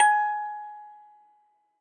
Metal cranktoy chopped for use in a sampler or something